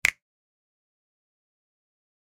Real Snap 25
Some real snaps I recorded with an SM7B. Raw and fairly unedited. (Some gain compression used to boost the mid frequencies.) Great for layering on top of each other! -EG
finger-snaps, snaps, percussion, snap-samples, snap, simple, finger, sample, real-snap